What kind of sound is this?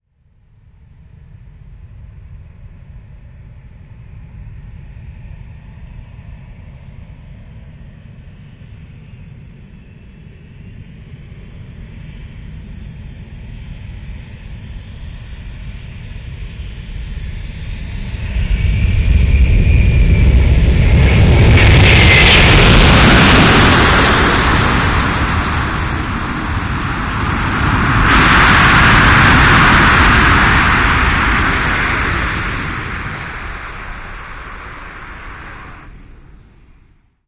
Massive Landing
Hard flying maneuver.
plane, flying-plane, massive, flow, close, landing-gear, technology, gear, big